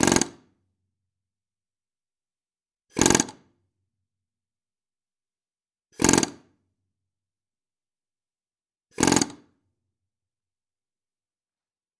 Pneumatic hammer - Atlas Copco r4n - Start 4
Atlas Copco r4n pneumatic hammer started four times.